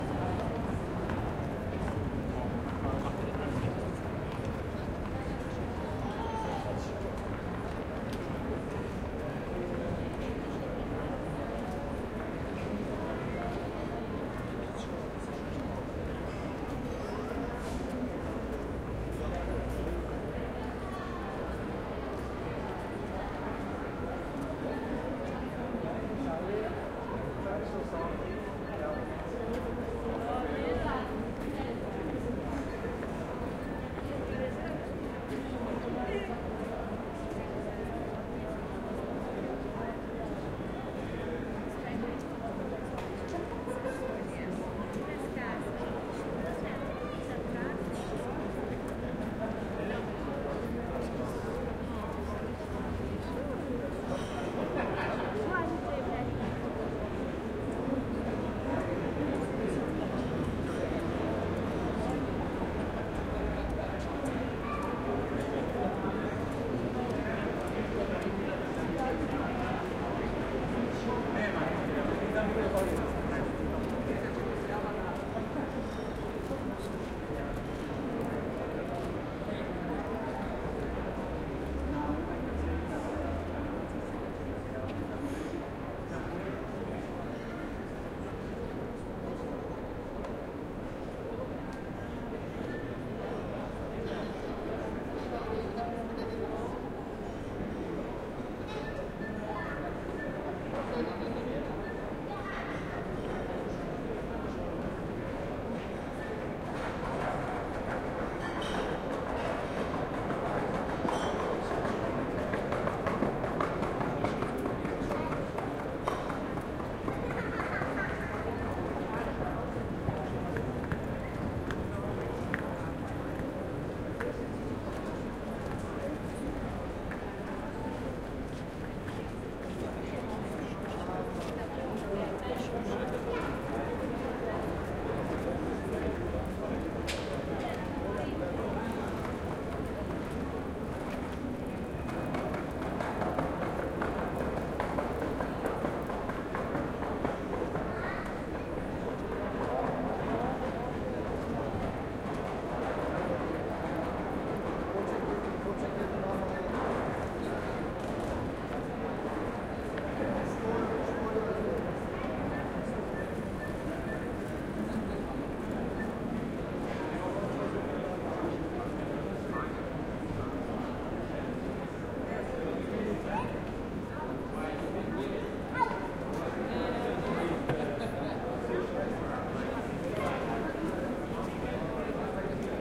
ambience Vienna Kaerntner Strasse 47
Ambience recording from the shopping street Kärntner Straße in Vienna, Austria.
Recorded with the Zoom H4n.